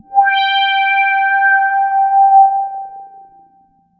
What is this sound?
Multisamples created with subsynth. Eerie horror film sound in middle and higher registers.
evil, horror, multisample, subtractive, synthesis